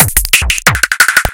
xKicks - Gator
Im sorry I haven’t been uploading lately… I really can’t give a reason as to why i haven’t been uploading any of the teaser kicks lately, nor have i given any download links for the actual xKicks volumes 1 or 2…
Ive actually finished xKicks volumes 1 - 6, each containing at least 250 unique Distorted, Hardstyle, Gabber, Obscure, Noisy, Nasty kicks, and I’m about to finish xKicks 7 real soon here.
Here are various teasers from xKicks 1 - 6
Do you enjoy hearing incredible hard dance kicks? Introducing the latest instalment of the xKicks Series! xKicks Edition 2 brings you 250 new, unique hard dance kicks that will keep you wanting more. Tweak them out with EQs, add effects to them, trim them to your liking, share your tweaked xKicks sounds.
xKicks is back with an all-new package featuring 250 Brand new, Unique Hard Dance kicks. xKicks Edition 3 features kicks suitable for Gabber, Hardstyle, Jumpstyle and any other harsh, raw sound.
Add EQ, Trim them, Add Effects, Change their Pitch.
kick-drum, hardcore, hard, gabber, single-hit, 180, bass, distortion, distorted